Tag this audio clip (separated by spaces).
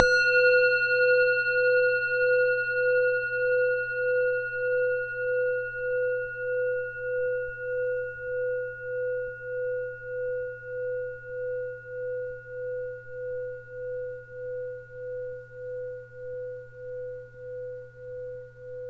bell bowl dang ding dong dung gang ging gong gung singing